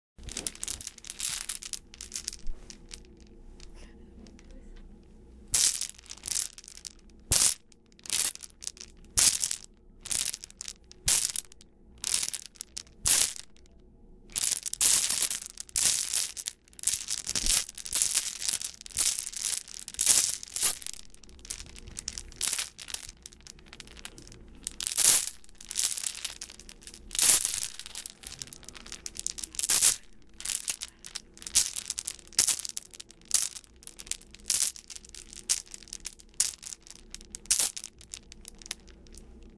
audio corrente bianca.R
barulho de correntes em tempo continuo.
chain noise in continuous time
a, chain, correntes, oites, terrestrial